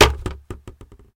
Plastic, jerrycan, percussions, hit, kick, home made, cottage, cellar, wood shed
wood, made, jerrycan, Plastic, shed, cottage, hit, cellar, kick, home, percussions